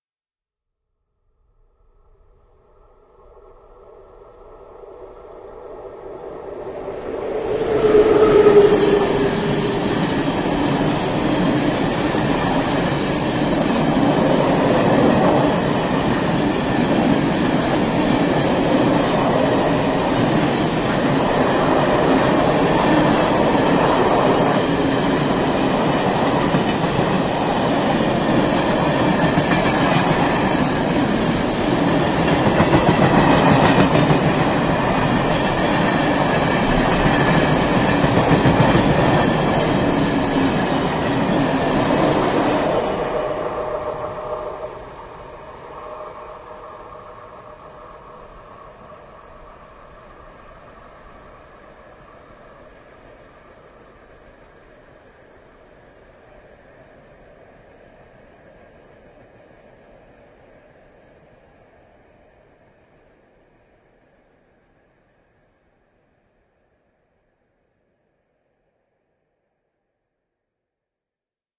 nákladní vlak
goods train, slower
mikro 2x RODE MT-5, XY stereo, M-Audio cardrecorder